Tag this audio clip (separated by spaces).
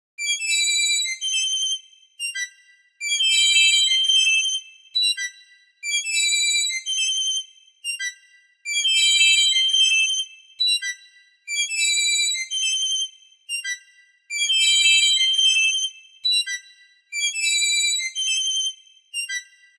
birds cartoon-sfx electric electronic for-animation